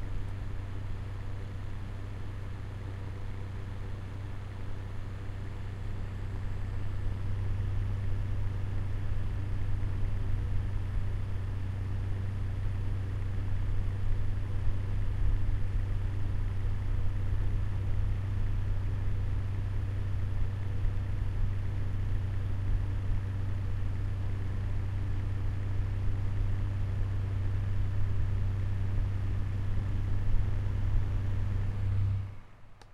A recording of my fan being on. Recorded using the Zoom H4N device
background, buzz, drone, fan, h4n, hum, noise, recording, self-recorded, zoom